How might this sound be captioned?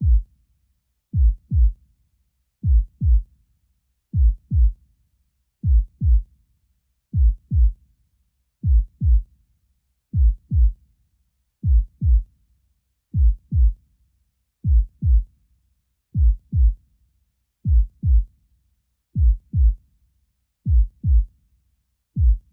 heartbeat reverb1
Heart beat sound created in FL Studio.
beat, pulse, heartbeat, heart